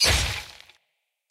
Hit Impact Sword
blade, game, hit, knife, medieval, slash, sword, sword-hit, sword-swing, torture, video-game